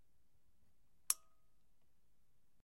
Lamp Switch Small 3
Small lamp switch turning on.